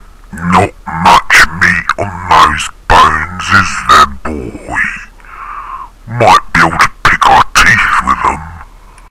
not much meat
2of2
made in Audacity with just a change of pitch
demon, devil, dog, food, humour, satanic, video-game